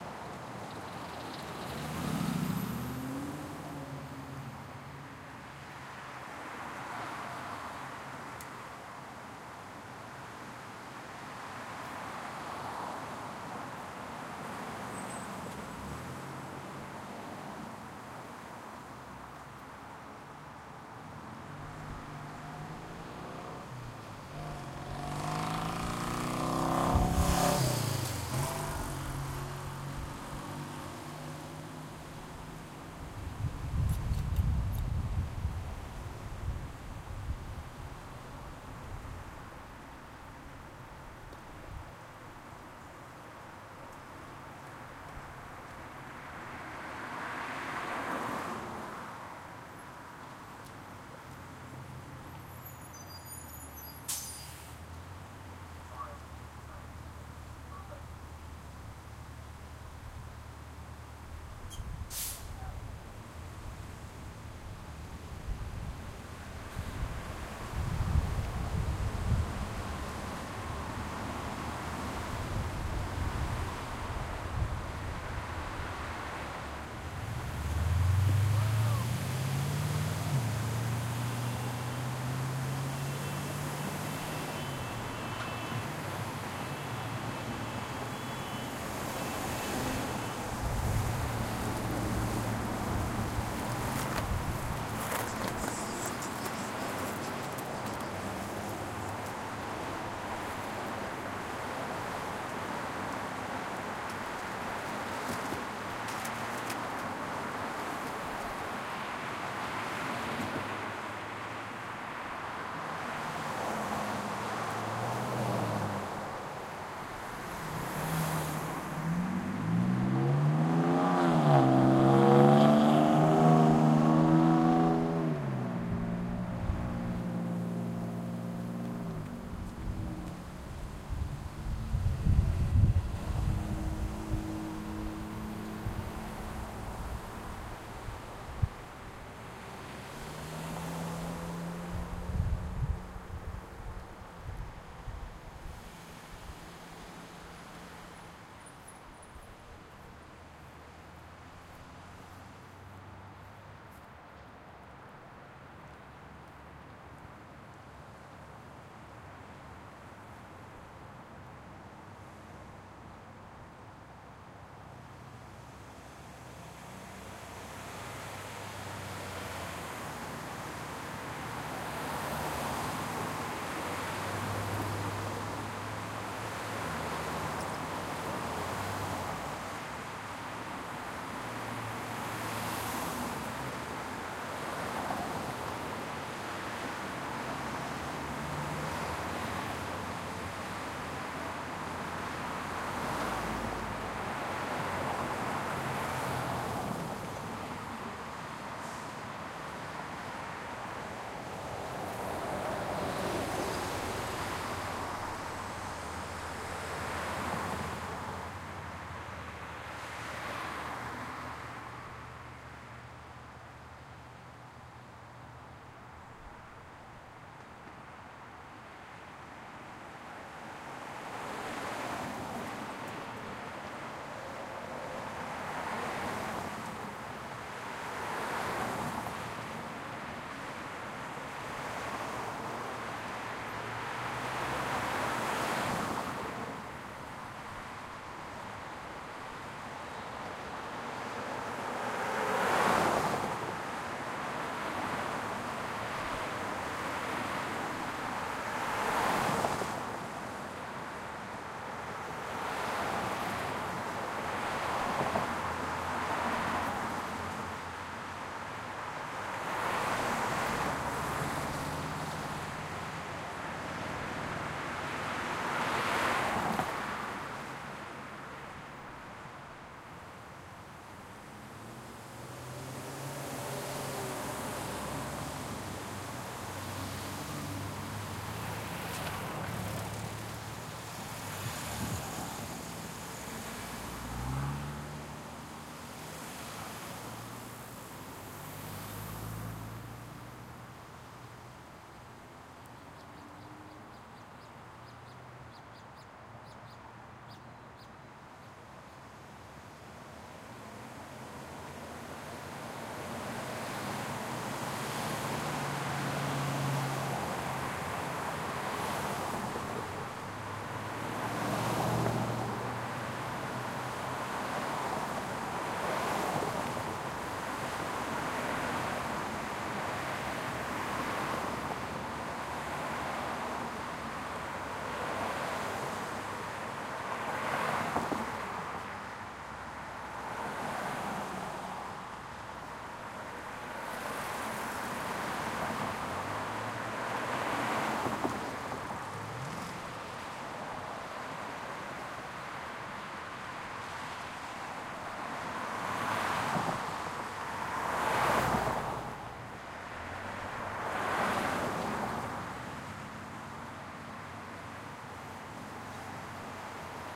Crossing a City Intersection by Foot
Crossing the intersection of Los Feliz Bl and Riverside Dr in Los Angeles, CA at approx 7pm.
los-angees, road, traffic